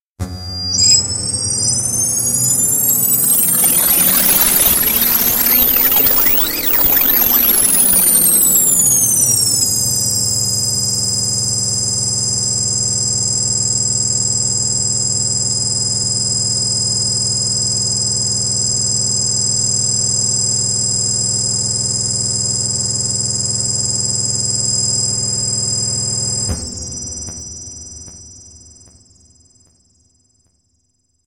A piercing scifi effect drone.